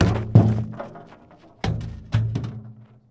Empty metal oil barrel is kicked and it falls down
barrel, clang, metal, metallic, oil-barrel